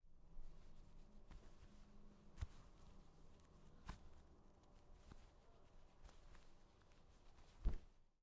Buttons Unbuttoning fast
Buttons on a shirt getting unbuttoned. Recorded with H4N recorder in my dorm room.
unbuttoning, buttons